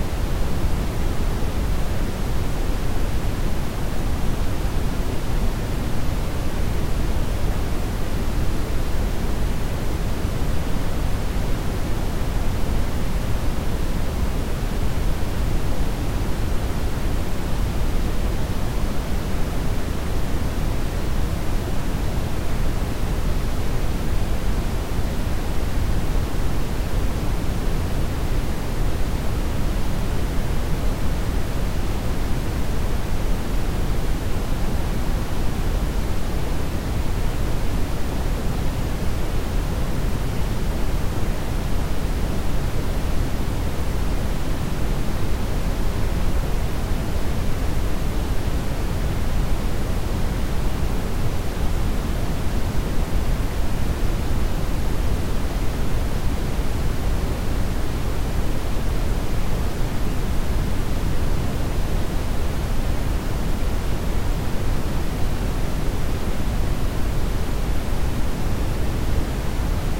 FREE! Brown-Noise background effect generated and mixed with Audacity. Modified to make it a bit different.